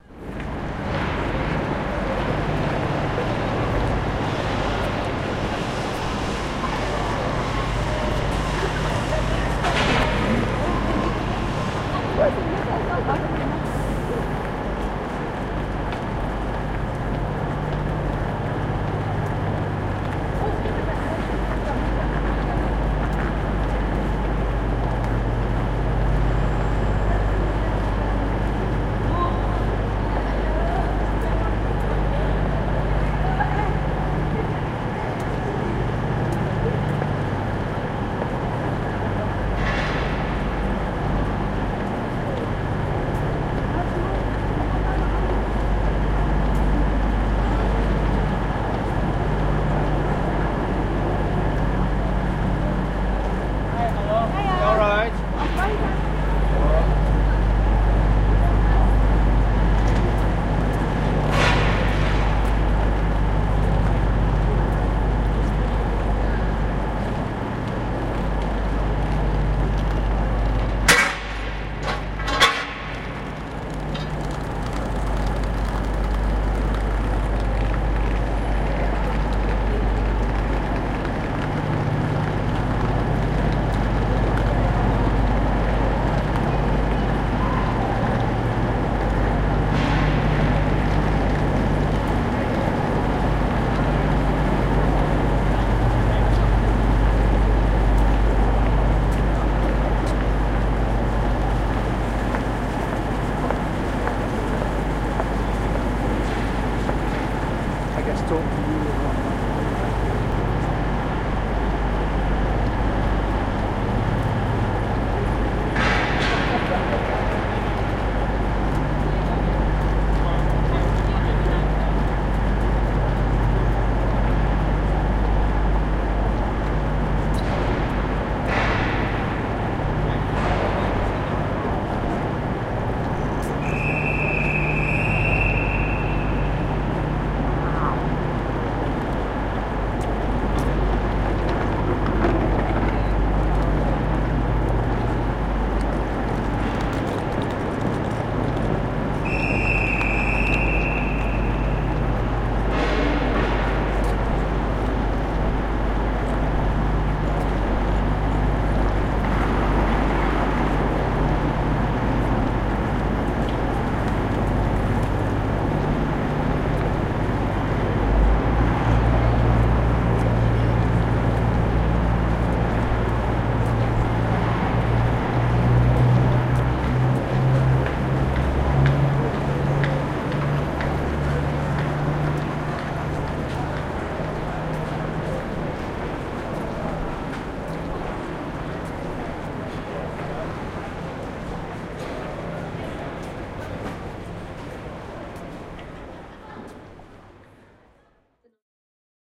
2 08 Kings Cross platform
The noisy ambience of Kings Cross sation London February 2008. The 7.55 Doncaster train has arrived and other trains wait to leave. Loud metallic noises are the sound of the covers being taken off the water fillers as the trains are resupplied.